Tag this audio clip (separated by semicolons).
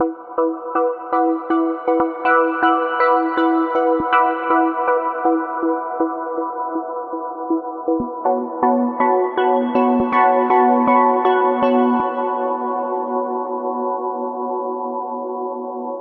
warm; euphoric; spacey; polyphonic; soft; calm; atmospheric; melodic; chillout; chillwave; far; electronica; ambience; distance; pad